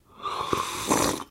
coffee slurp 8
slurping a coffee number 8
slurp, drinking, sucking, espresso, slurping, sipping, cup, coffee, slurps, tea